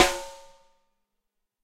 Ludwig Snare Drum Rim Shot